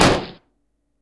small explosion
created by combining these sounds: